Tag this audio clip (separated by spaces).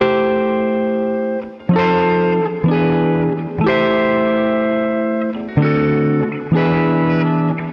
guitar retro vintage